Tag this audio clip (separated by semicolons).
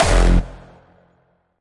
kick; hardstyle; bass